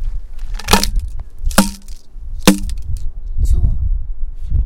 A plastic bottle is hit against a rock.